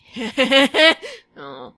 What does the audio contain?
laughing that quickly goes downhill